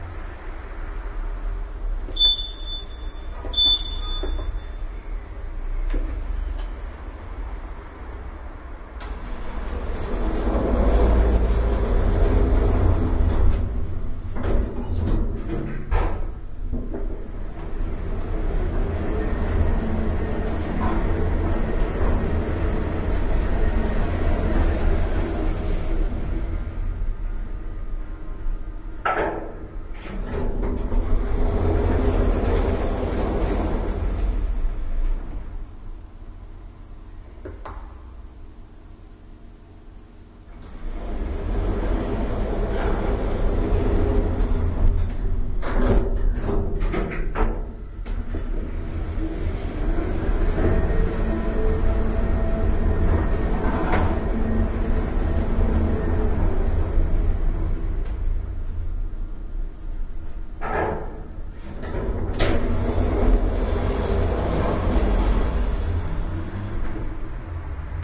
Here is the sound of a lift, recorded from standing inside, with the door closing, a ride down, the door opening and closing, then a ride up and the door opening.
OKM microphones, A3 adapter into R-09HR.